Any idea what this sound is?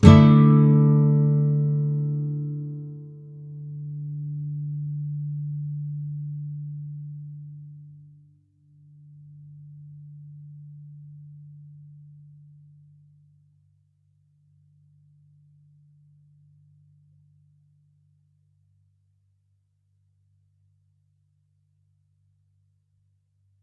Dadd2 full OK
Standard open Dadd2 chord. The same as A Major except the E (1st) string is open. Down strum. If any of these samples have any errors or faults, please tell me.
clean, acoustic, guitar, nylon-guitar, open-chords